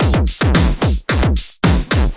Ruff Drum 110
Some cool glitchy noise stuff I been toying with